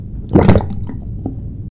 Sink Drain
draining, sink, drain